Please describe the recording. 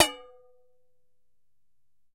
hit - peanut can 10
Striking an empty can of peanuts.
bang, banged, can, canister, collided, collision, container, crash, crashed, empty, hit, impact, impacted, knock, knocked, metal, metallic, smack, smacked, strike, struck, thump, thumped, thunk, thunked, thunking, whack, whacked